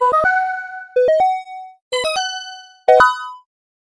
Made in Pixietracker
4 different sounds
bit, Cartoon, Confirm, Game, Jogo, Menu, SFX, Success, UI, UX